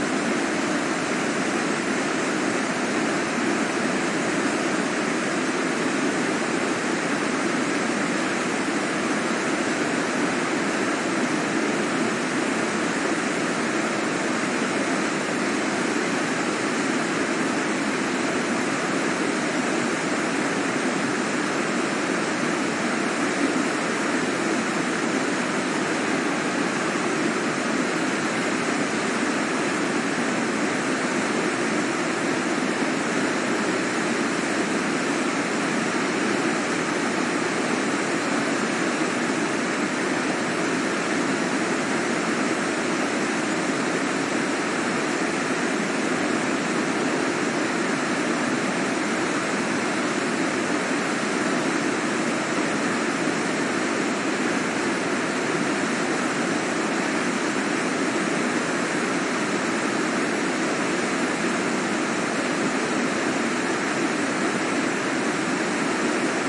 Noise of the Ayasse Torrent, near Chardonney (Valle de Aosta, Italian Alps). Shure WL183 mic capsules into PCM-M10 recorder
water, ravine, stream, mountain, field-recording, river, torrent